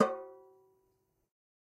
Metal Timbale right open 017
conga, god, home, open, real, record, trash